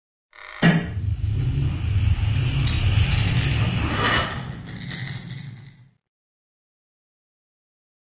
rope and pulley
Sound Design - the effect was for a sandbag to come loose before it hits the floor (in front of an actor). The pulley sounds were combined with a creek, a twang and some rope sounds to create this effect - edited in Sound Forge, combined in VEGAS. Reverb was added in Sound Forge - to sound like it's happening up in the rafters.
pulley, soundeffect, spinning, wheel